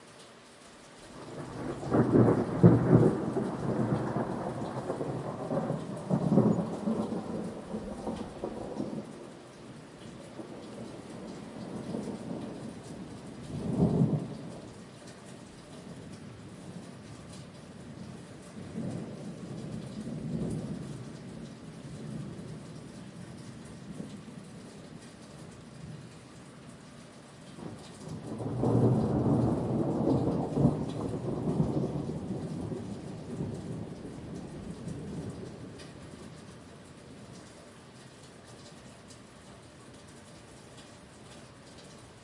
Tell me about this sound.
Thunderstorm, medium rain, city, street
storm, field-recording, ambience, thunder, atmos, nature, ambient, weather, lightning, rain, thunderstorm